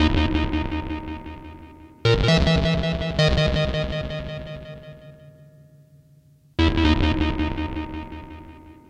sh101modmelo4
Roand sh101 through springreverb
springreverb, synthesizer, sh101